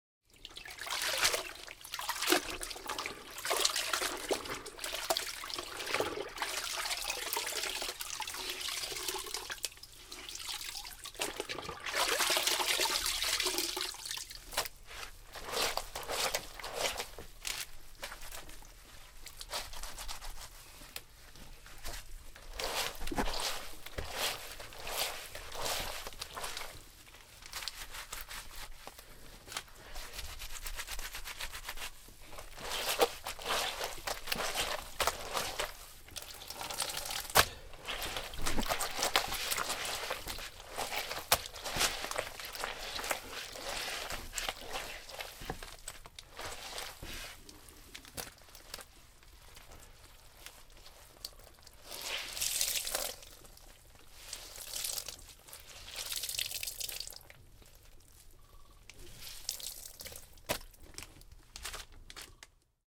Washing Cloths by hands-1
I washing cloths by my hands.
The recording setup:
AKG C 1000S Microphone → SoundDevices MixPre-D as Audio Interface → Ardour Digial Audio Workstartion to record, edit and export
foley, cloths, water, motion-picture